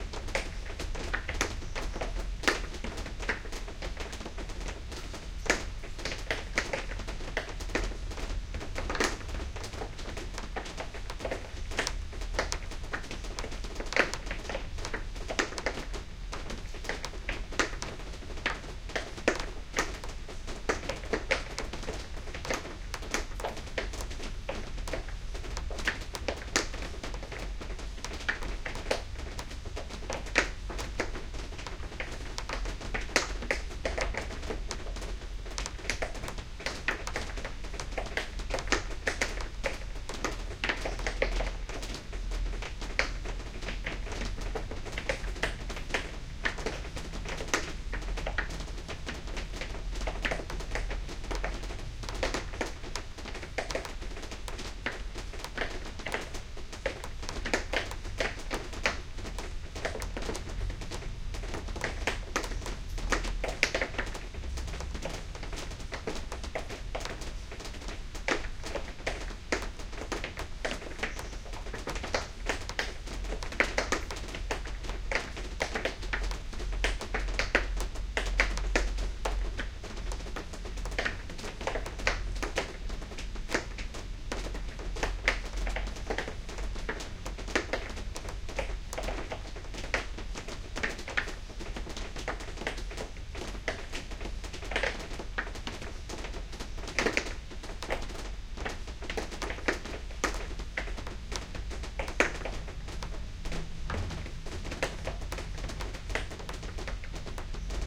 Rain in Bangkok - Windows Closed
raining, raindrops, weather